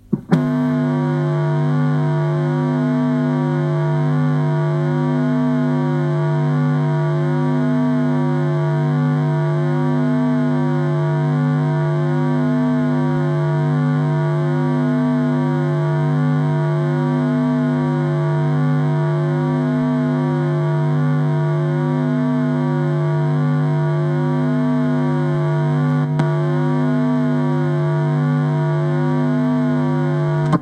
Phaser Feedback 1
XLR, electronic, distortion, microphone, wave, tremolo, machine, feedback, electric, guitar